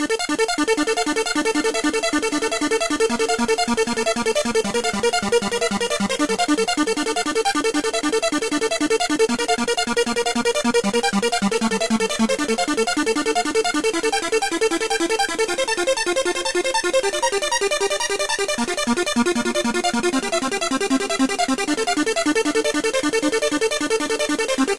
well... i have done some trance stuff to use in songs... if there's any need for them... i hope you people like them, I'm making more all the time. i saw there's no-one that good as Flick3r on trance/techno loops here, so i decided to make my place here as a nice electronic sampler/looper. cheers.
150, bmp, sequence, trance